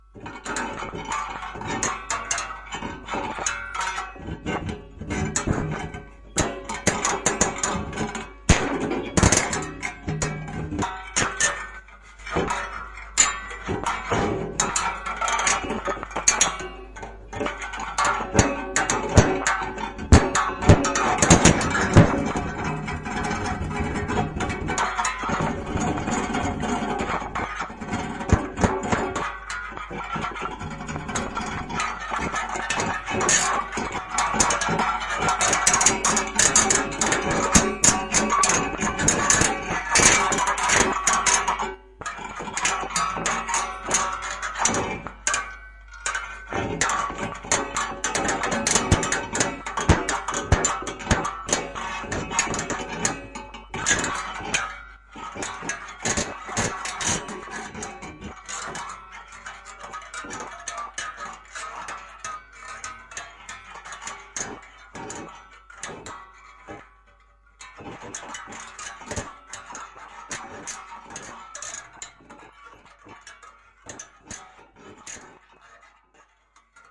NASA succeeded to record short conversation between two unidentified citizens somewhere in Orion, but the language s not like ours. Sounds mechanical. Perhaps two robotics gossiping?